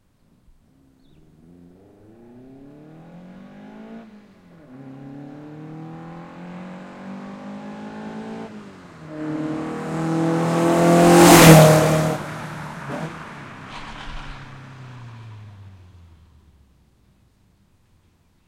Full Take - Car Approach and Pass By
Two different microphones used one tracking(shotgun) and one static(condenser). Split the stereo file to get control over each's different quality.
Medium-Speed, Lotus, Sports-Car, Doppler, Approach, Pass-By